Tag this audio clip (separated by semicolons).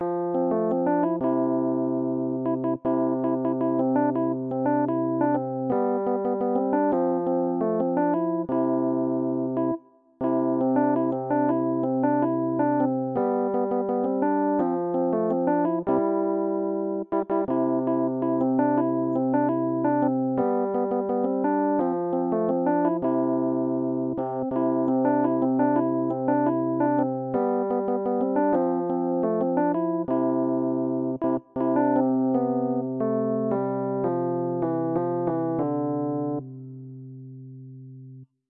electric; keyboard; piano